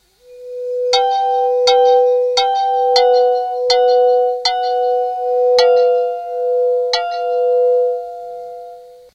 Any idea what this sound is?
small music elements